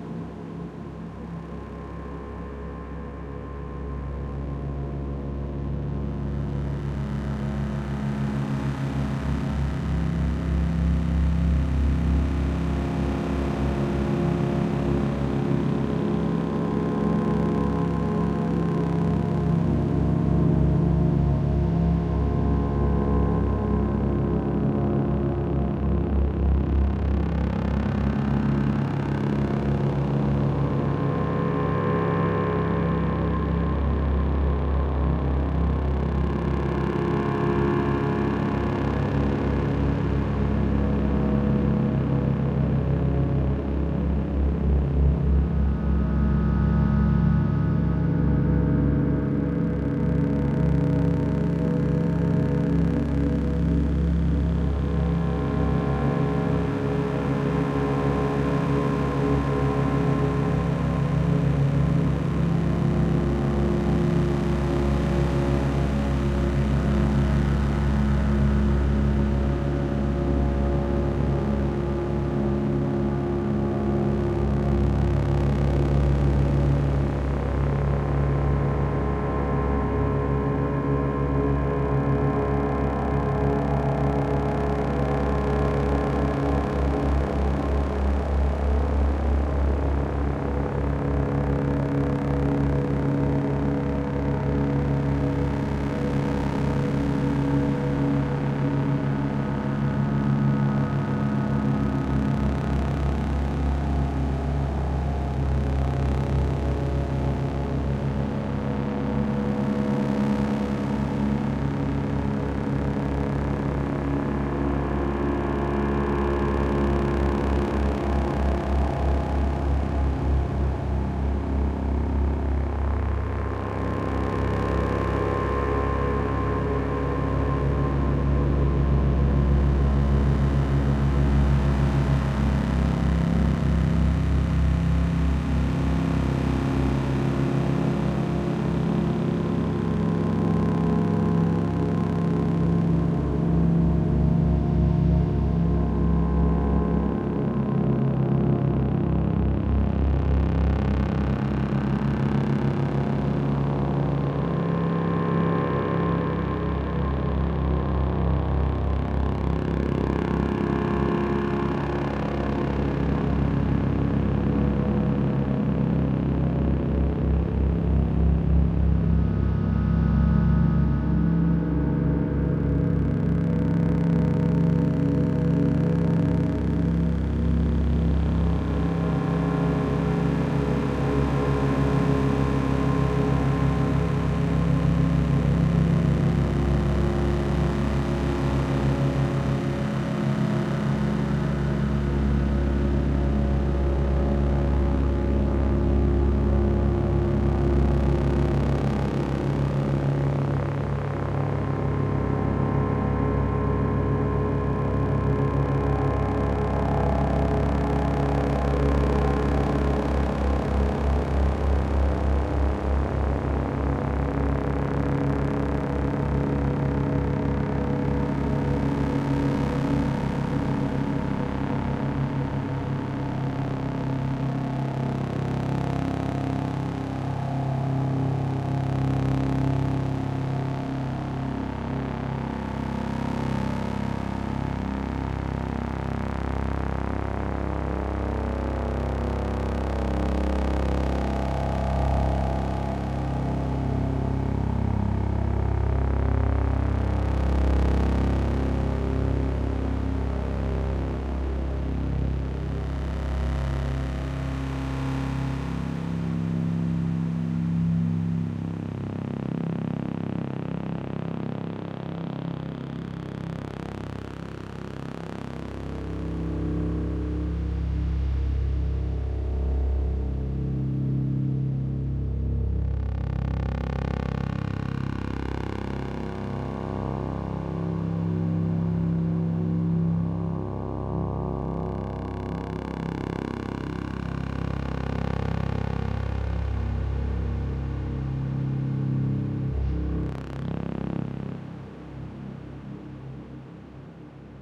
Spooky ambience
one take dark ambience created with an eurorack setup. 3X Disting Wavetable oscillators morphing slowly. Valhalla reverb added in post.
ambient, anxious, background, background-sound, bogey, creepy, dark, delusion, drama, dramatic, eerie, film, frightful, game, grisly, haunted, imaginair, imminent, macabre, movie, phantasm, phantom, scary, sinister, spooky, suspense, terrifying, terror, thrill